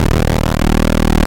APC-BassLoop1
glitch, drone, Lo-Fi, Atari-Punk-Console, noise, diy, APC